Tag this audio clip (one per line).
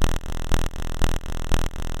goa-trance-loop
goa-trance-beats